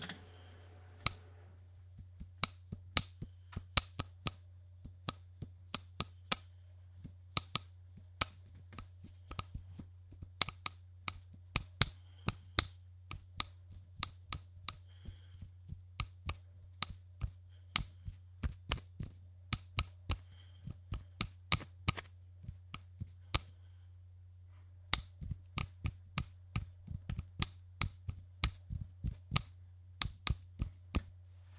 Tapping mini-mag flashlight on palm and fingers
Tapping a mini-mag flashlight into my palm and fingers
Tapping, hand, palm